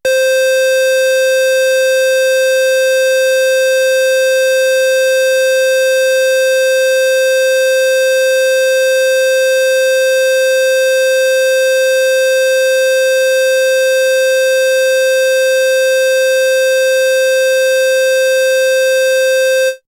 Mopho Dave Smith Instruments Basic Wave Sample - SQUARE C4
smith, basic, instruments, sample, wave, dave, mopho